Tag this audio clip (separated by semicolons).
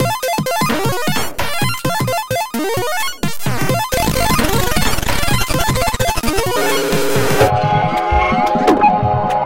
breakbeat; glitch; idm